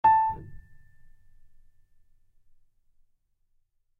acoustic piano tone